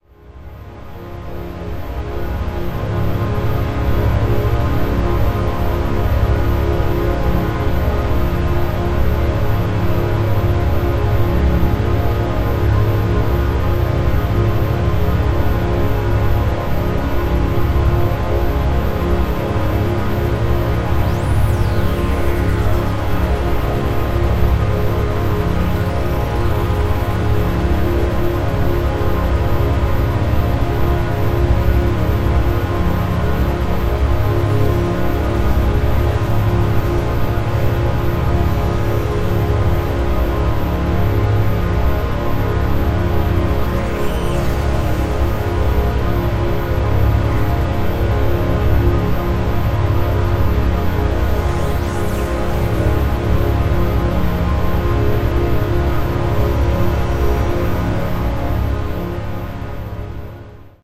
atmo-digital forest
a deep and moody drone/pad/atmo with some highly sizzling stuff going on.
deep, pad, ambient, atmo, drone, dubtechno